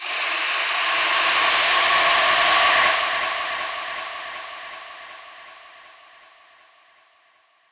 This was the begin with a brush in our dirty old room of our radio-station :D